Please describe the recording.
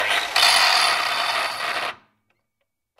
Angle grinder - Fein 230mm - Stop 1 time
Fein angle grinder 230mm (electric) turned on and pushed once times against steel.
crafts, industrial, work, grind, metalwork, motor, labor, 80bpm, fein, 1bar, machine, tools